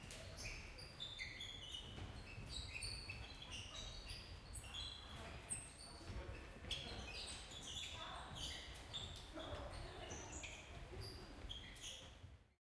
Sound Description: sounds while the krav maga lesson
Recording Device:
Zoom H2next with xy-capsule
Location: Universität zu Köln, Humanwissenschaftliche Fakultät, sports hall, #216, ground floor
Lon: 6.919444
Lat: 50.933611
Date record: 2014-11-19
record by: Stoffel and edited by: Stoffel/Pettig/Biele/Kaiser
2014/2015) Intermedia, Bachelor of Arts, University of Cologne